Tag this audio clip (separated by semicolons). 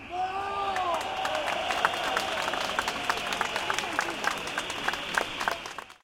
demonstration; labour; whistle